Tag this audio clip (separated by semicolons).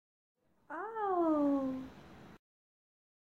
final; sonido